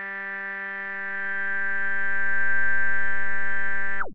Multisamples created with subsynth using square and triangle waveform.